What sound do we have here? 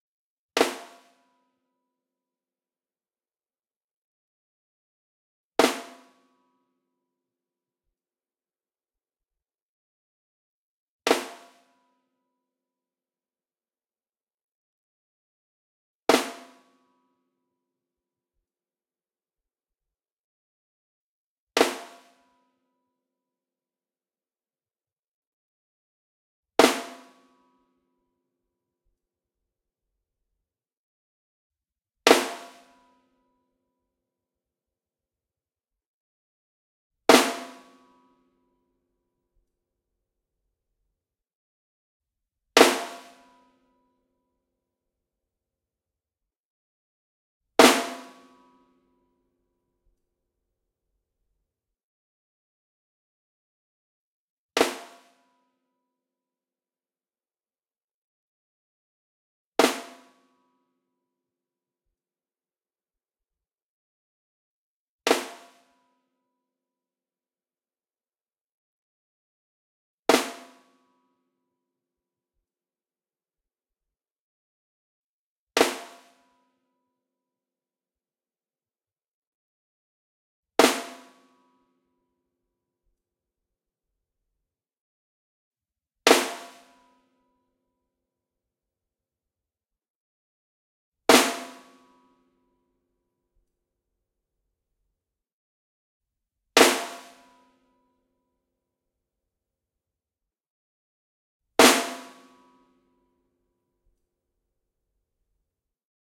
Loudest Piccolo Snaredrum Hits (Rimshot Flams)
Reasonably successful attempt at recording the loudest possible snare drum hit possible on a sharply ringing piccolo snare. Used an SM58 mic above the snare, and two AKG room mics. Two recordings for the price of none. Plus editing....
Post-processing: there are two alternating hits (1-2-1-2-1-2, etc.), they get increasingly louder as the compression/limiting gets opened up. In the middle, its starts again, and this time the stereo width also gradually increases.
smack, rimshot, loud, drumkit, rim, snaredrum, piccolo, flam, drums, flams, acoustic, hit, drum, snare